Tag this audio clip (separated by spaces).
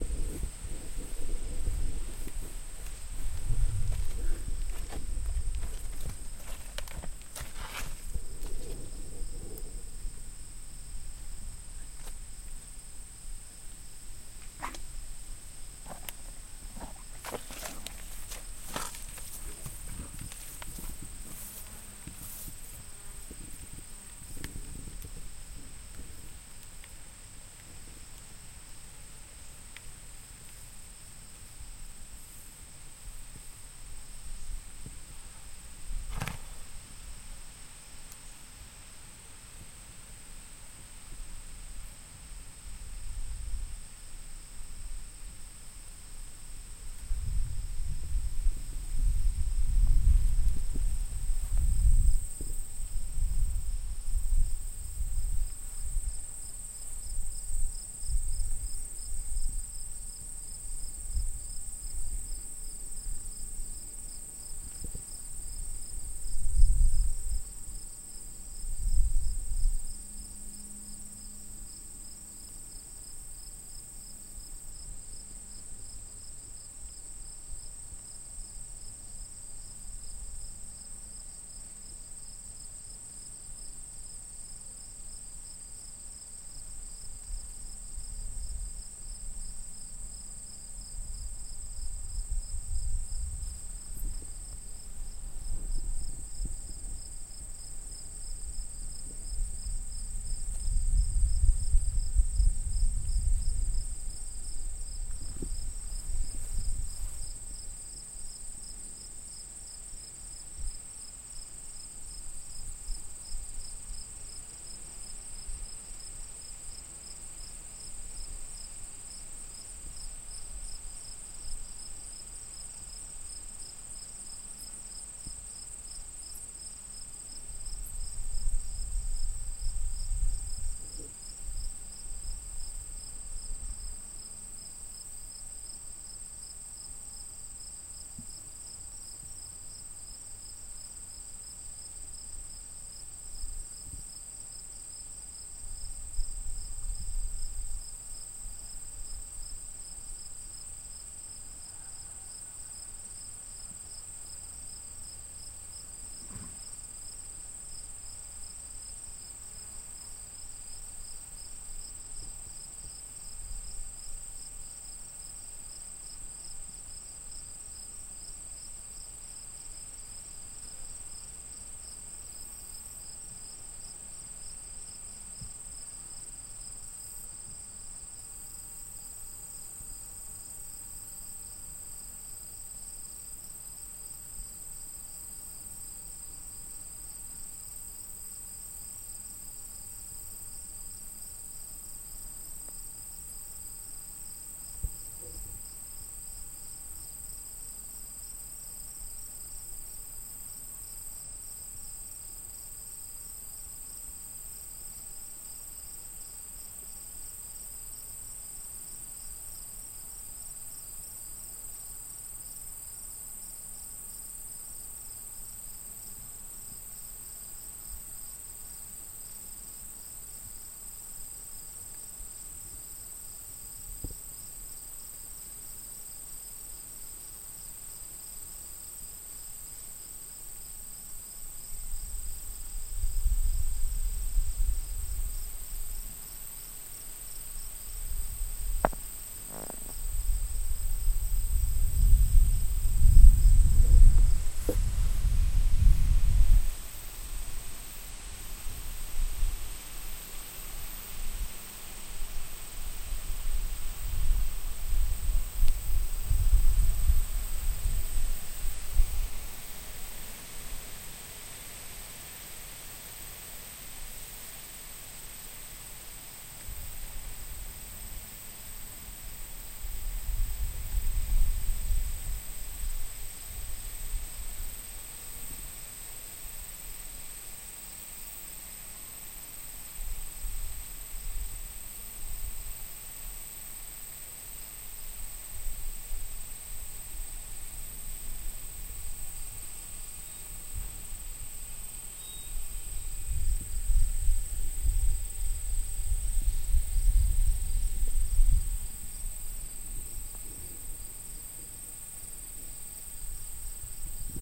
day nature farm insects horse summer daytime bugs crickets field-recording wind